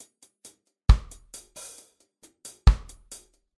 Reggae drum loops
drum, reggae